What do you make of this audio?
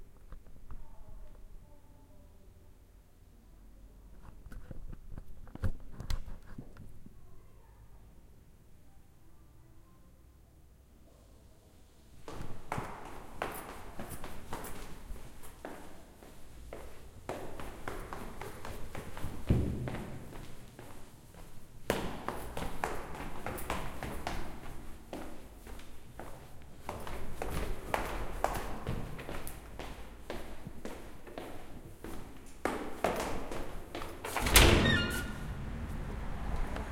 Stairway walk
Walking through the hallway and on stairs. Recorded with Zoom H4n Pro.
foot, footsteps, feet, Walk, ground, walking, step